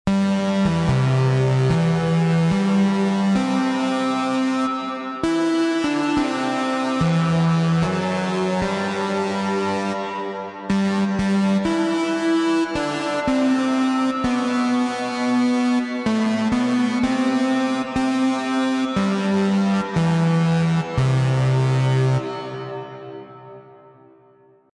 US Anthem First part on 70s vintage synth - ring mod pedal - tube amp - plate reverb
American Anthem, first 9 bars.
Vintage sound setup.
Rare KORG synth from 70's playing through ring modulation pedal, tube amp and plate reverb. You can't get more authentic on retro sound then that.
American, analog, anthem, national, plate, reverb, seventies, synthetizer, tubeamp, vintage